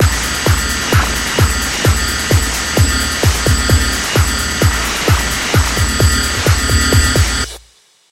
ocea130 08 remix oo1
This is a remix of oceas 8th loop at 130bpm, the samples in this pack were made mostly by running the original loop through a custom plugin i made with synthedit. The general idea of the plugin is to seperate the incoming audio into 8 seperate channels using high-resonance bandpass filters, then run each of the 8 channels through its own special effect including reverb, delay, harmonic generation, ring modulation, modulation of the original signal by the harmonic generation signal out, and another reverb.
ocea, fancy